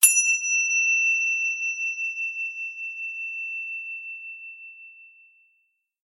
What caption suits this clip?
A nice crisp sound, rather high-pitched.
bell, bowl, meditation, zazen, zen